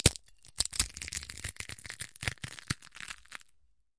chips - crunch - with plate rattle
Crushing chips in my hand, with the minor sound of chip pieces falling onto a ceramic plate.
crunch, crunched, plate, mash, chips, crushed, chip, rattle, junkfood, mush, crush, crunchy, crunching